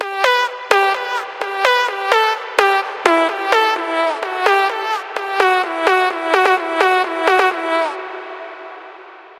Cloudy Fart Melody
The cloudy melody was made from recorded real fart just for fun.
Enjoy!
atmospheric, farting, sound, sound-effect